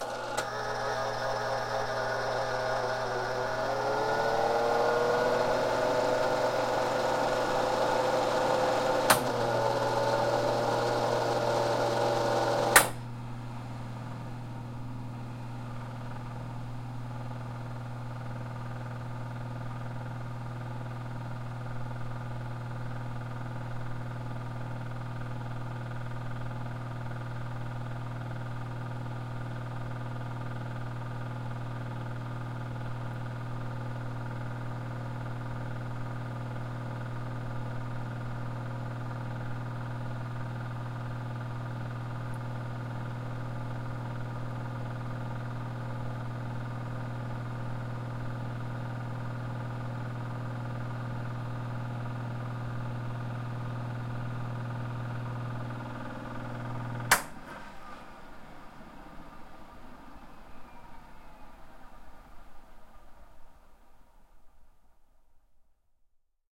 FX ORGAN HAMMOND M111 STARTUP RUNNING AND SHUTDOWN
Sound of a Hammond M-111 organ engine. Startup, sound of it running for about 10-15 seconds, and then shutdown. Microphone placement in front of the cabinet. Recorded with a Tascam DR-40.